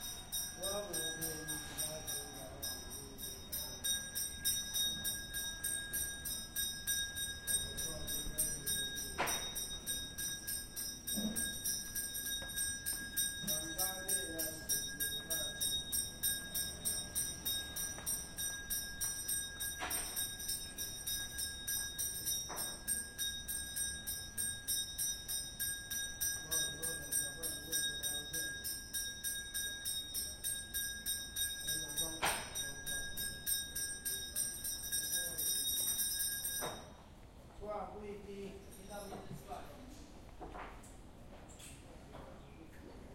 taipei temple bell
a bell sounding in a temple in taiwan
ambient, bell, soundscape, temple